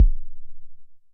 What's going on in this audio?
Just some hand-made analog modular kick drums